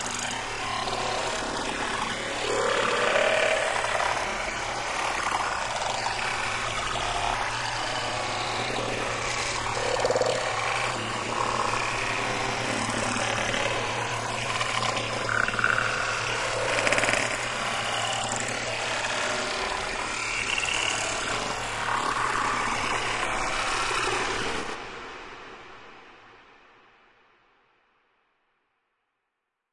Processed Babbling Brook 1
Originally a recording of a brook in Vermont (see my Forest Ambience sound pack), time stretched and pitch shifted in BIAS Peak and processed with Sean Costello's Valhalla Room reverb.